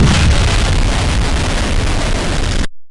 A distorted explosion sound.